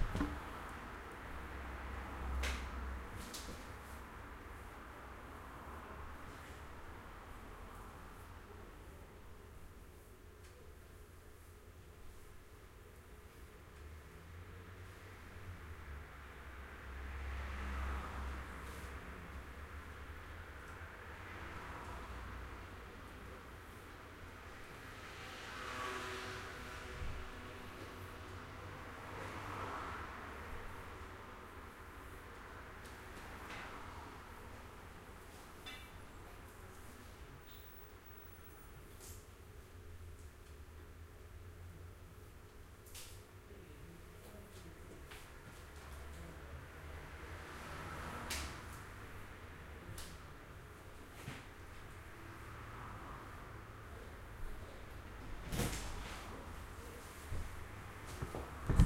salon atmos
Interior of a hairdressers, with a glass front on to a busy road. No talking or loud activity, just the occasional movement.
Recorded on H4N Zoom
Hairdresser, internal, Salon, traffic